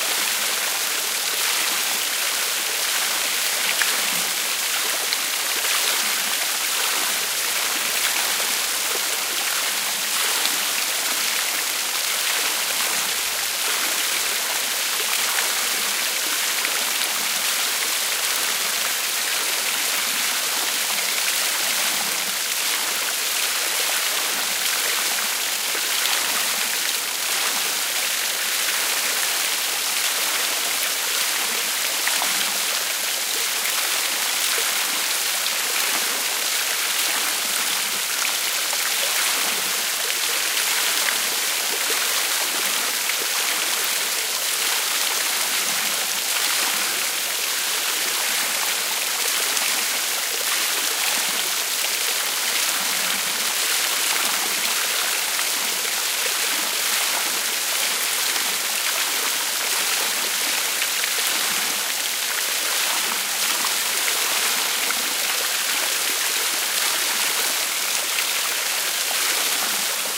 small water fall in the woods 1
A half mile into the woods is a small clearing. The clearing is created by a large area of granite, upon which vegetation can not grow. There is a small stream that starts at the top of the granite swath. The water flow changes many times before leaving the granite for more vegetation. This is a close-up recording of one little bit of a small waterfall, which occurs halfway down the granite slab. Recorded with a Zoom H4 on 25 July 2007 near Rosendale, NY, USA.